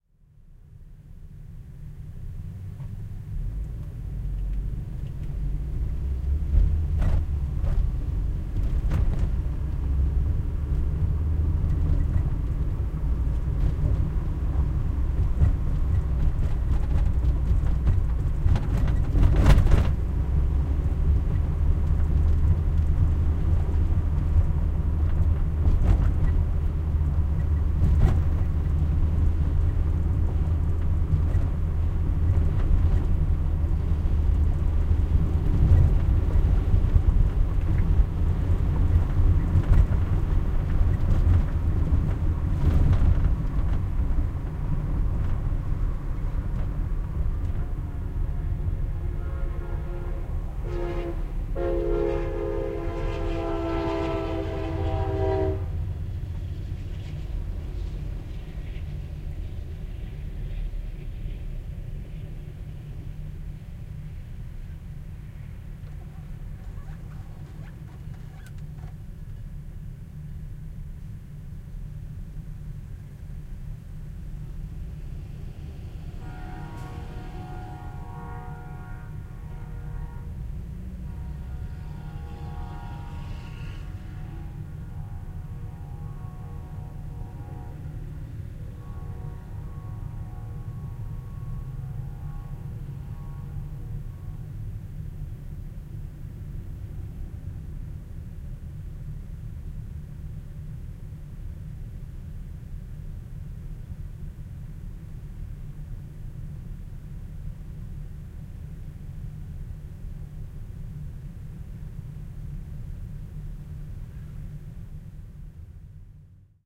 crossing tracks
Driving in my car over a bumpy road. (My car is showing its age.) I stop at a railroad crossing with about 10 cars in front of me. Amtrack Texas Eagle passes by going from Fort Worth to Dallas (left to right). It blows its horn as it passes and again as it comes to another crossing further down the tracks. ECM-99 in the back seat of my car - recorded onto SonyMD.